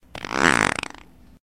A Taco Bell after effect.